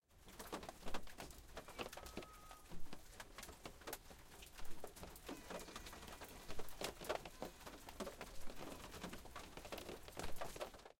Cat meows when it rains
After I arrived home my cat was already waiting for me meowing at the front door